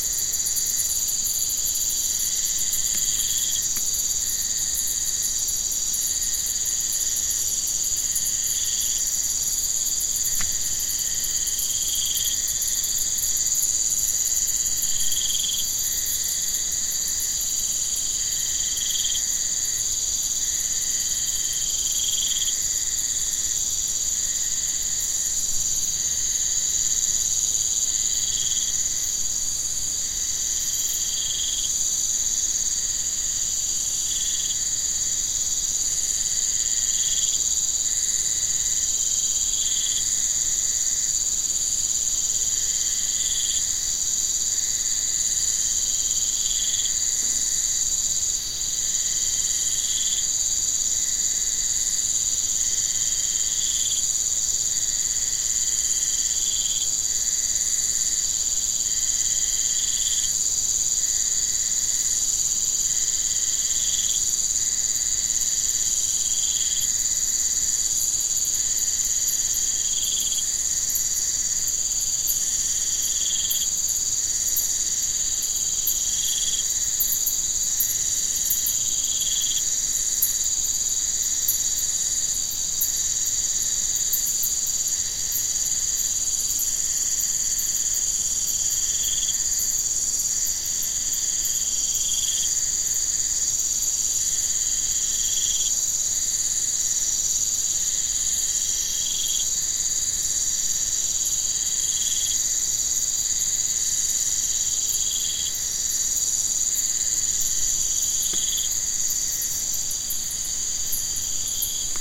Selva Pucallpa
It has been taken from the Peruvian Jungle at night
bugs, field-recording